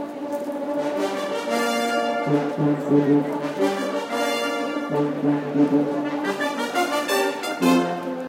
20151207 brass.fanfare.loop
Snippet of brass quintet performance edited to form a loop. Soundman OKM mics into Sony PCM M10